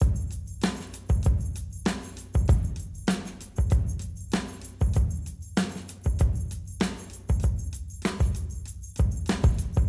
A drumloop I created with Kontakt 5 in Ableton. Slowly but surely, enjoy!
Drum-Kit, Funk, Funk-drums, Funkgroove, Drums